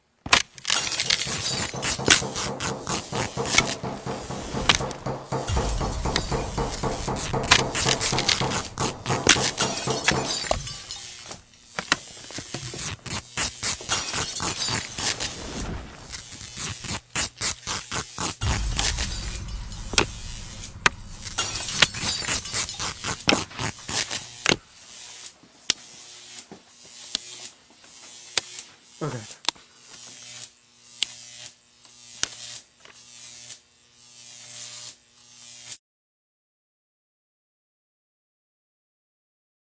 Set KIlled
For the show of Noises off we needed a set destruction Sound Effect. so I just put some stuff together and Created it.
hammering, arc, creaking-wood, crumbling-set